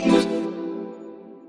click sfx1
This is a pack of effects for user-interaction such as selection or clicks. It has a sci-fi/electronic theme.